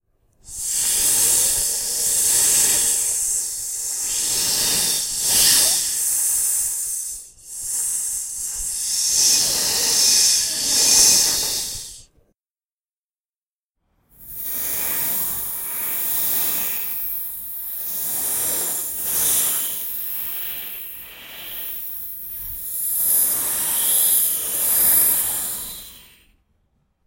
I'm a snake. Hisss!
Recorded with Zoom H2. Edited with Audacity. The second part is like the first part but paulstretched 2x and then sped up by 100%.
animal, bible, carnivorous, creature, devil, evil, heaven, hiss, hissing, long, mouth, mythological, poison, reptile, serpentes, slick, sound, tropical, venom, voice, zoo, zoology